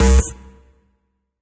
MS - Neuro 008
my own bass samples.